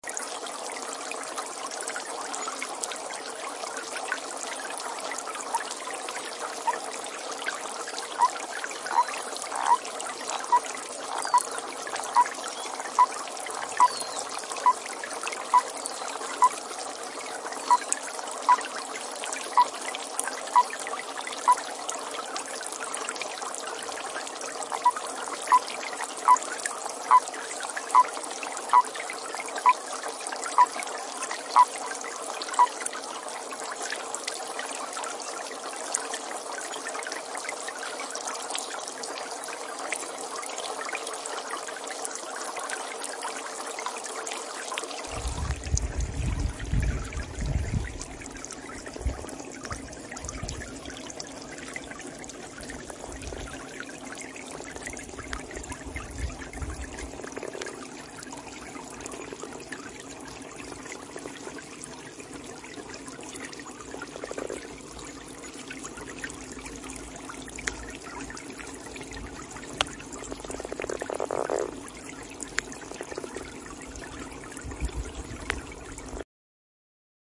Stefan, a frog from Poland.
Sorry, he is actually a toad!
So, this is a recording of a toad in the pond. I recommend to watch the video, especially the second one, which will explain the bad quality of a second sound.
sound, frog, water, badquality, toads, croak, ambience, noise, frogs, field-recording, pond, toad, nature, ambient